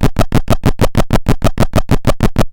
Mangled drum loop from a circuit bent kid's keyboard. This one's glitched beyond recognition.